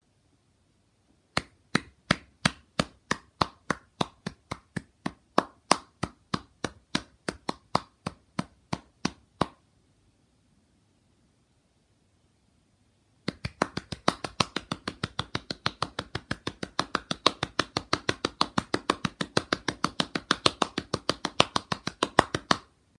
Single person clapping. Average speed and faster speed at 13 seconds.
applaud, clap, clapping, hand-clapping, slap